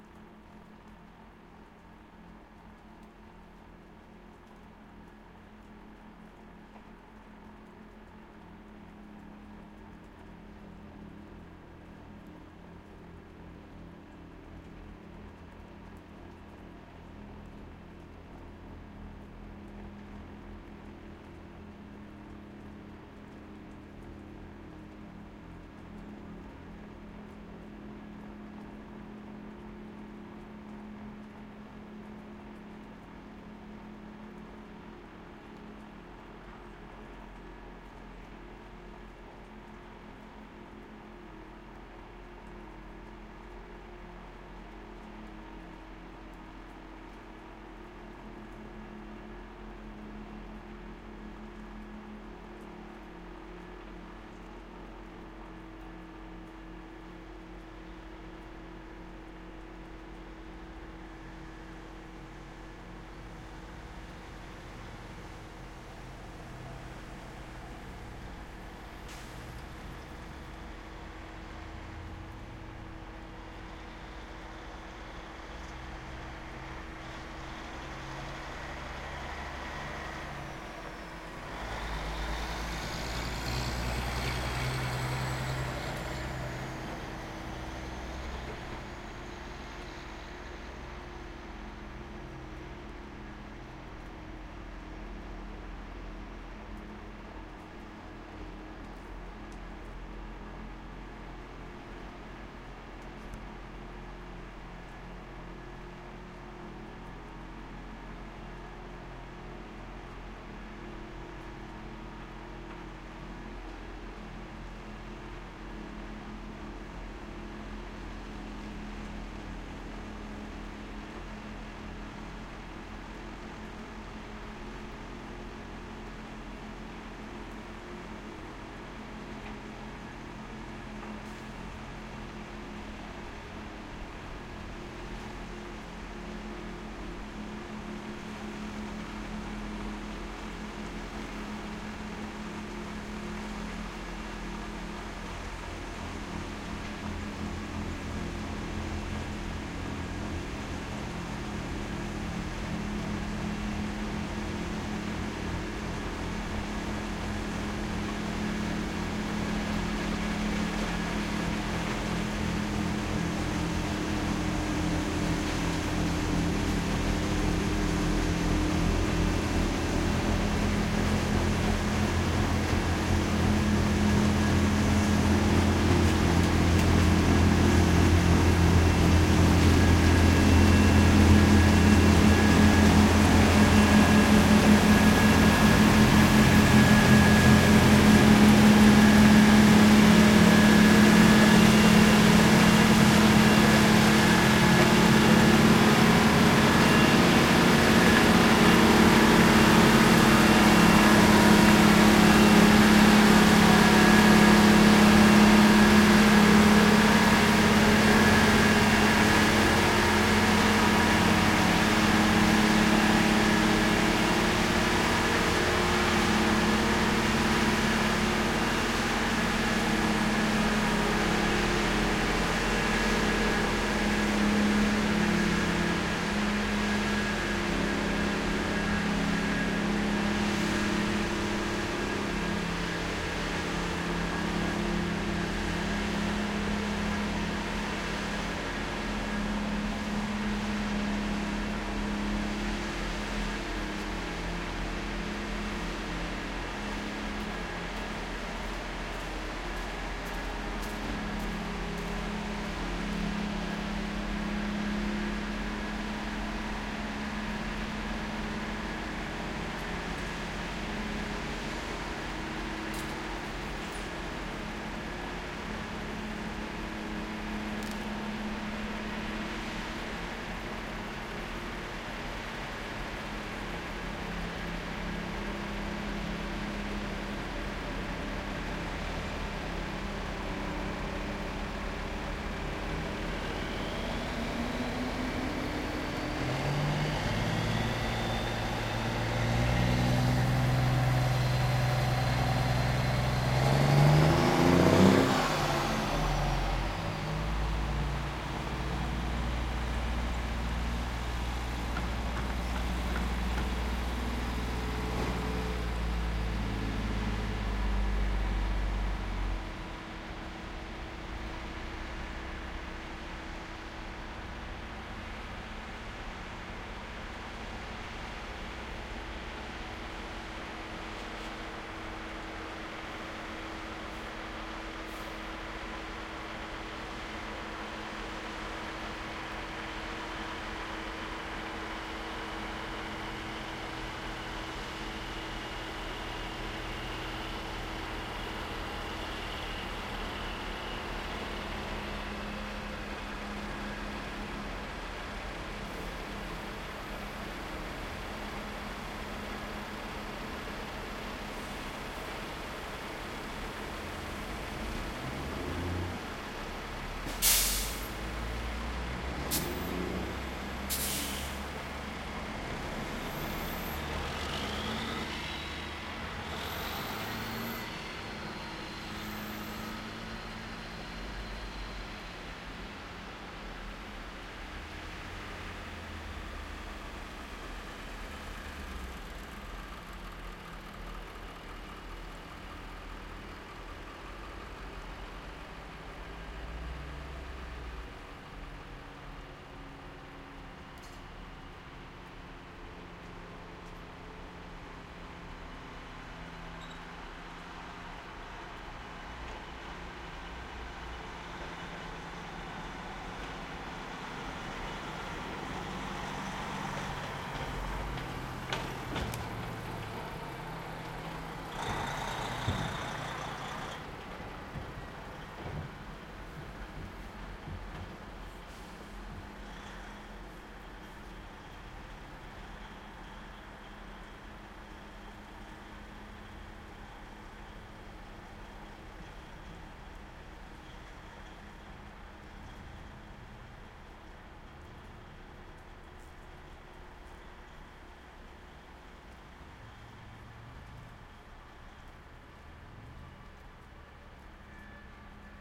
snow blower pass by Montreal, Canada
blower, by, pass, snow